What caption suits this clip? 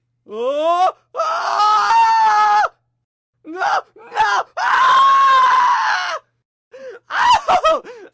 Jared Helm
Some great screams of pain. A bit of distortion but the sound itself is awesome.
blood, curdling, funny, scream, shrill